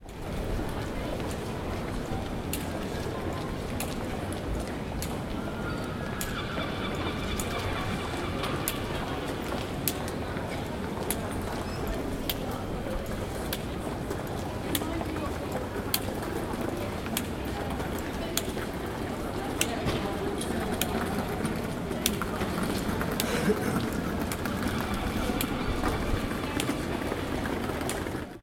Street atm
Pedestrian street in Birmingham, UK. You can hear the seagulls. Recorded with Zoom H5.
city, field-recording, street